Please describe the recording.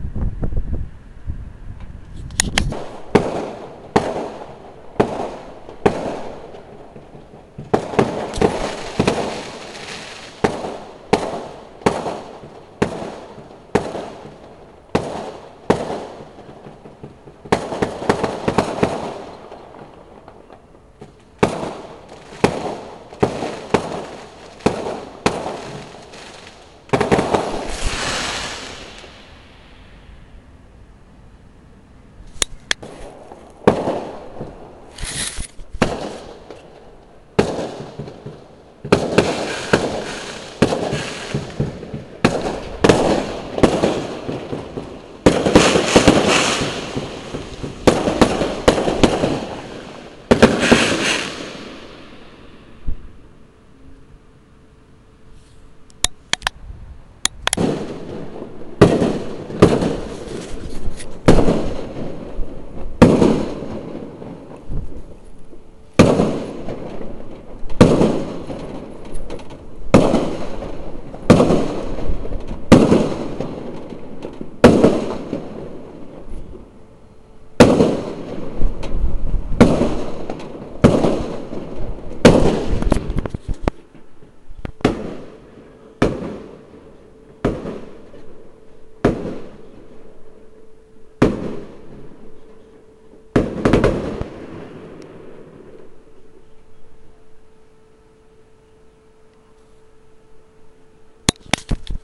A series of short bangs and booms from a nearby firework display recorded from my attic room.
warfare, dynamite, fireworks, pyrotechnics, gunfire, bombs